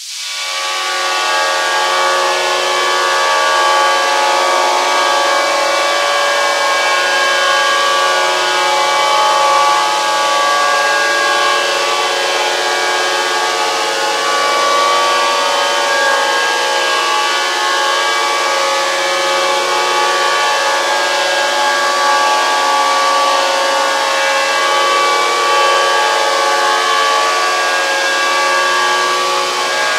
cavernous white noiz
cavern, echo, noise, reverb, white